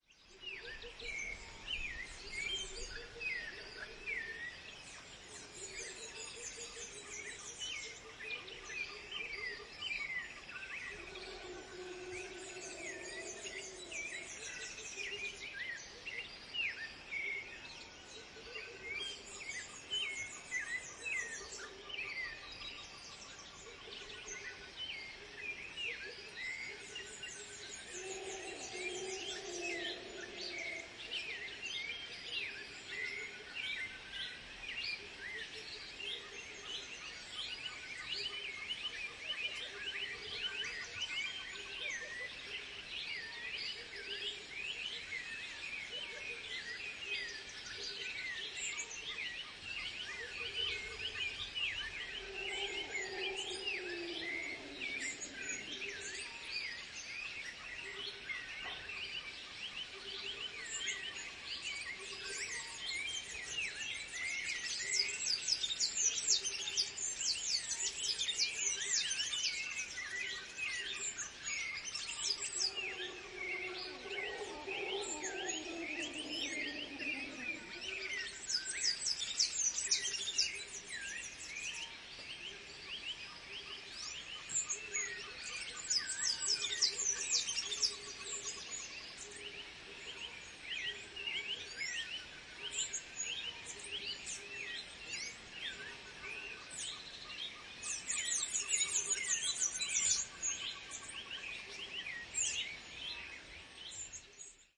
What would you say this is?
Howler Monkeys and Birds in Costa Rica at Dawn
bird, birds, costa, dawn, field-recording, forest, insects, jungle, monkeys, nature, rica